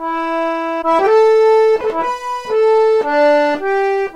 Accordeon melodic riff/phrase. Recorded with binaural mics + Core Sound Mic2496 preamp +iRiver H140.